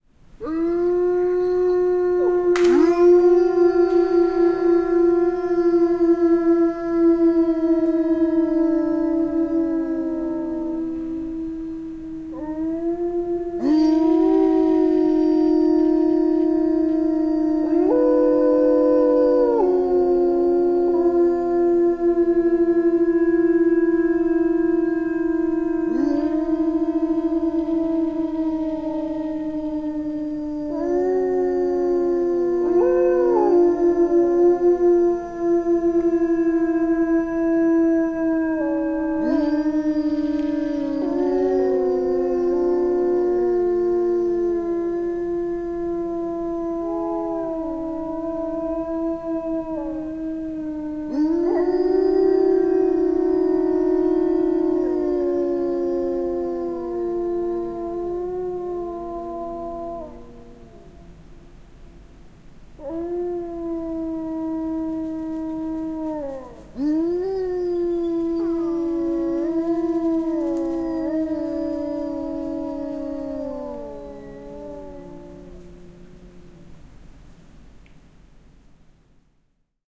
Sudet ulvovat / Wolves howling, small pack, frost snapping
Pieni lauma susia ulvoo. Välillä pakkanen naksahtaa puissa.
Paikka/Place: Suomi / Finland / Ähtäri
Aika/Date: 21.04.1994
Wolf, Susi, Finnish-Broadcasting-Company, Suomi, Field-Recording, Wildlife, Wild-Animals, Finland, Soundfx, Yleisradio, Tehosteet, Animals, Yle